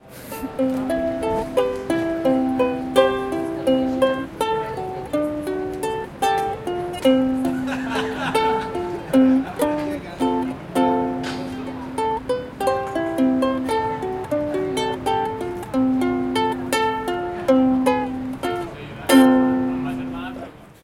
Sound of a guy playing Ukulele at plaça Guttenberg at UPF Communication Campus in Barcelona.